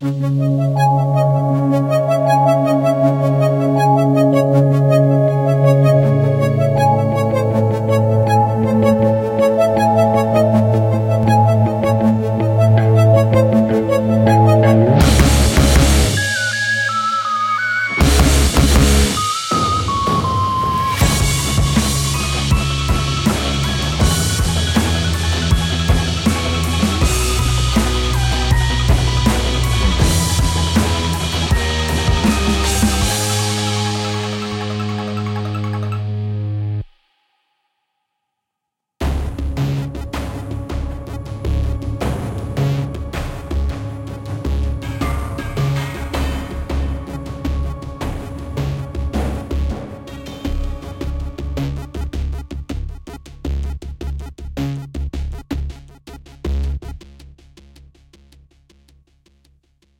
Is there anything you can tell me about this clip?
Bit Forest Evil Theme music
Dark theme music of for a concept track called bit forest